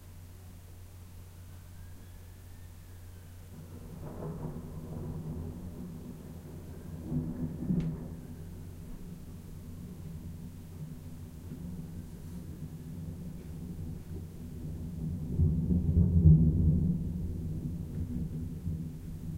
Far Siren Thunder From Window
Recorded with a Zoom H4n onboard microphones, spur of the moment kind of thing. No checking for an optimal recording position, or levels. I just switched it on, opened the window fully and started recording. The batteries were running on empty so I quickly caught as much as I could.